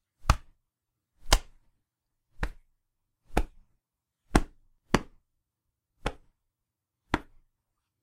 The sound of me hitting my chest. You could use it for fight scenes etc.